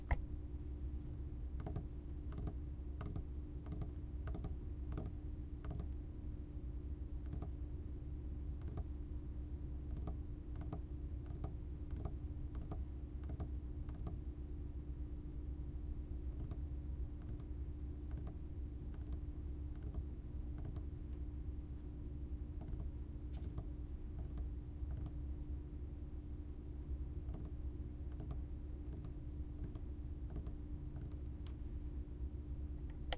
Zoom H4n recording of fingers wrapping on table impatiently.
Originally recorded for the web series "Office Problems".
fingers, hitting, impatient, tapping, hit, table, tap